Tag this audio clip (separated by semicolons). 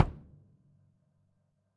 bass-drum; bd; kick